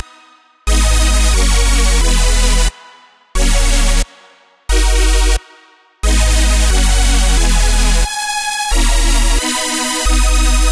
Taken from a track I produced.
FOLLOW FOR FUTURE TRACKS!
All samples taken from the song: I am with you By: DVIZION